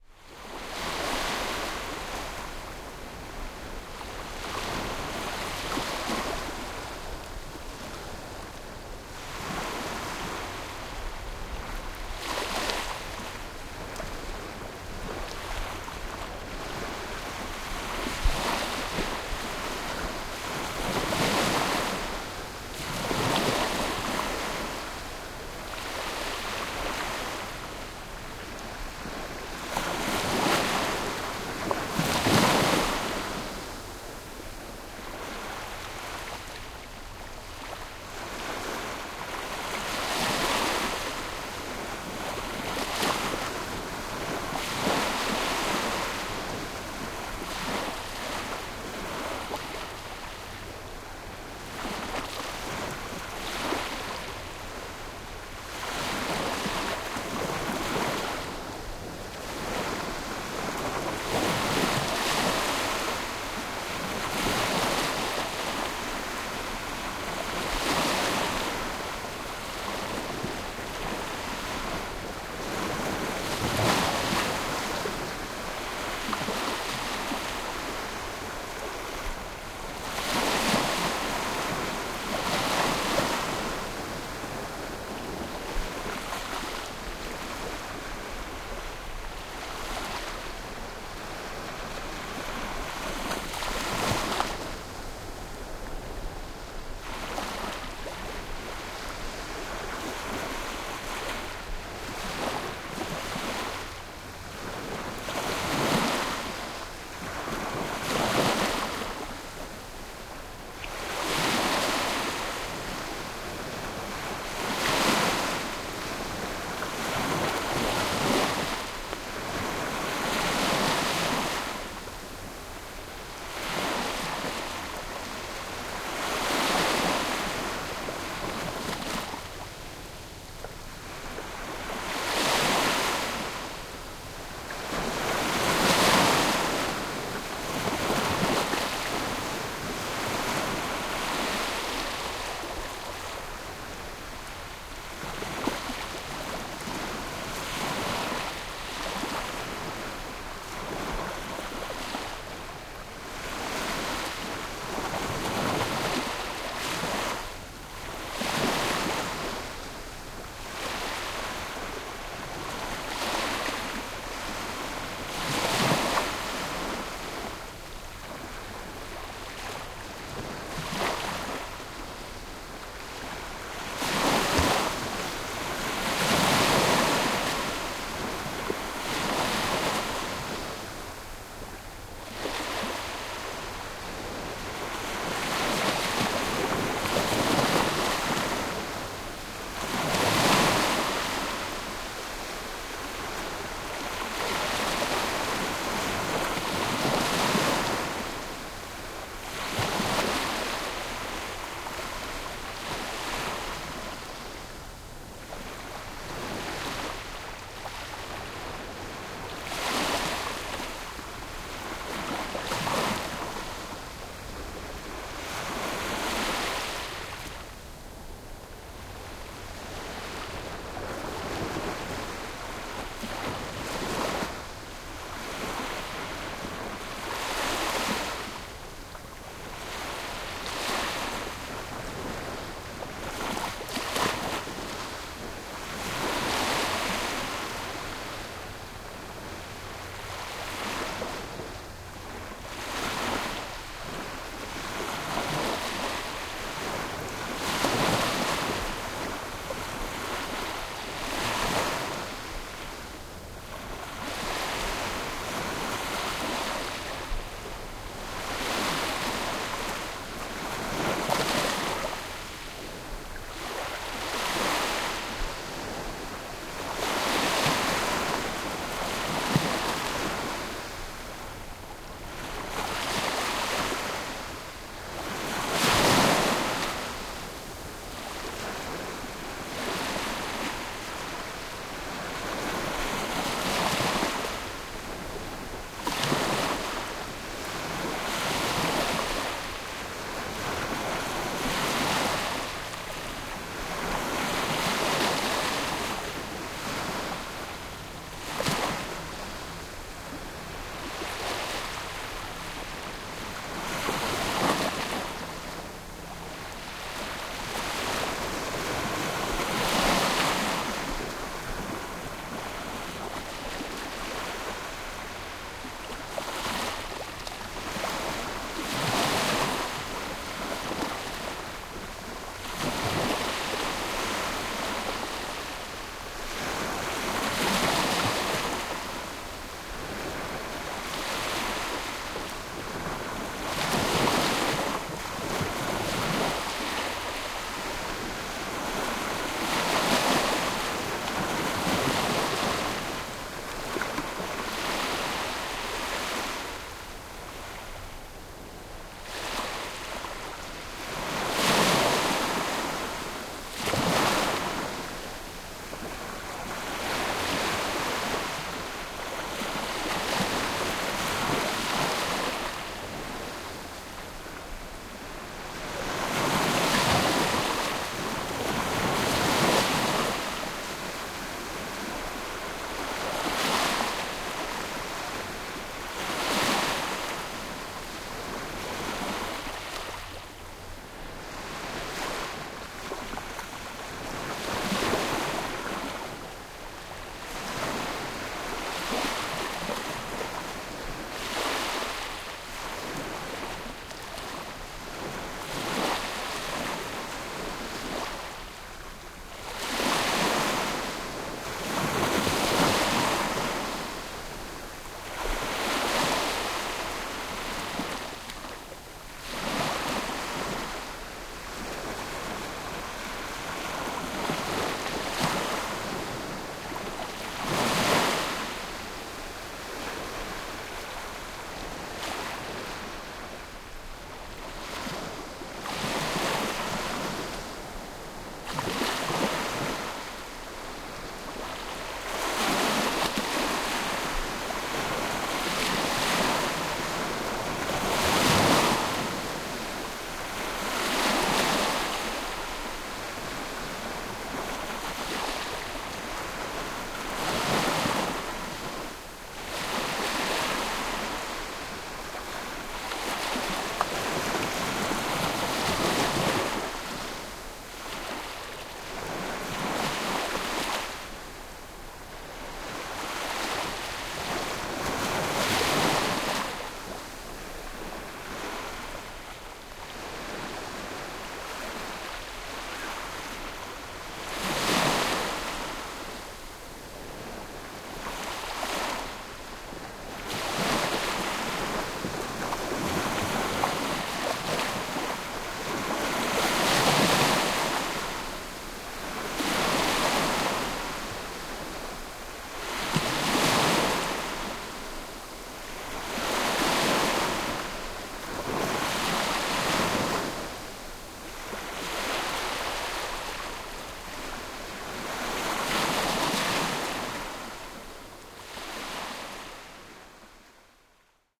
VOC 161102-1190 PH Beach
Sunset on the beach (Philippines).
Calm sea waves recorded during the sunset on Masasa beach (Tingloy island, Philippines).
Recorded in November 2016, with an Olympus LS-100 (internal microphones).
Fade in/out applied in Audacity.
shore, soundscape, sea, field-recording, seaside, island, Philippines, ambience, ocean, wave, coast, beach, waves, calm, atmosphere, sunset